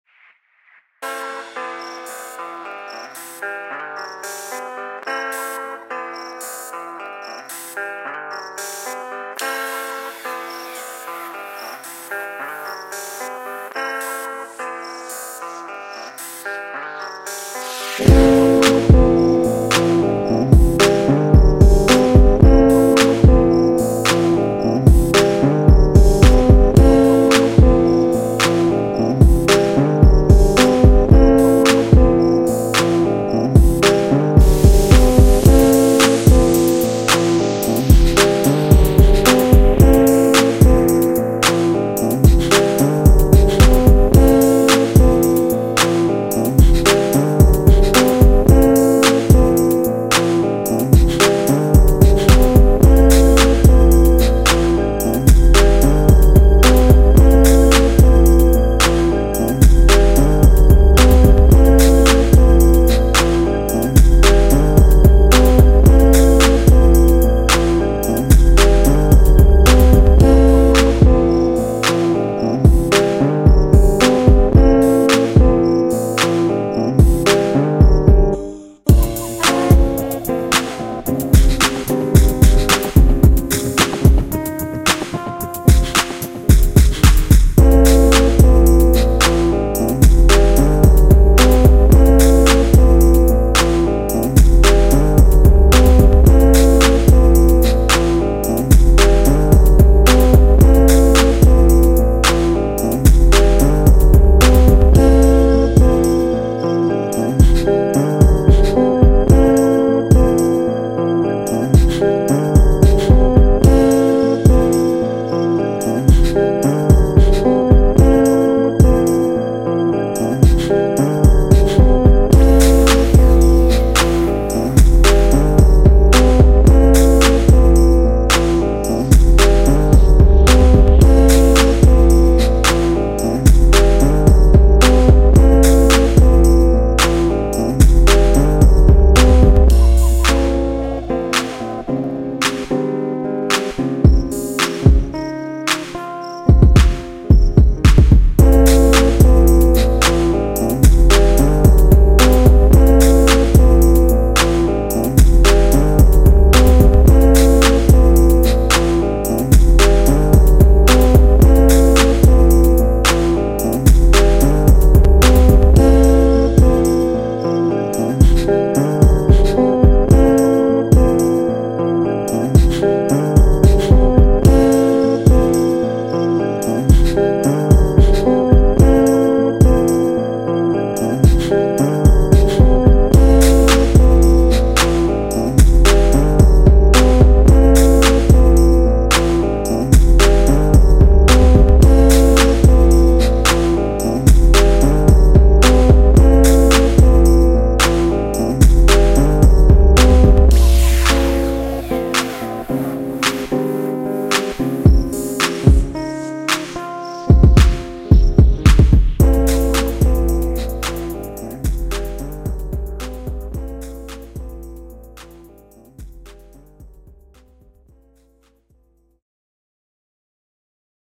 Stars and Fields prod by flames360
this is a beat i made with some melodies i found from here ! enjoy .
guitar, music, instrumental, melody, song